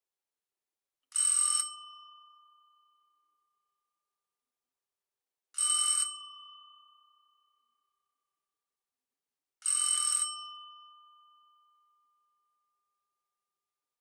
Ring Bell
Ringing, Antique, Bell, Plate